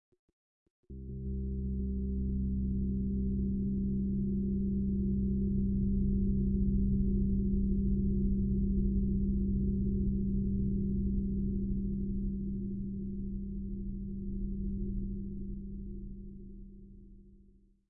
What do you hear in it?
Somewhat creepy bass drone made with vst synths. No other processing added.